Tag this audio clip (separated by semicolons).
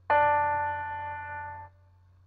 d4; normal; note; piano; short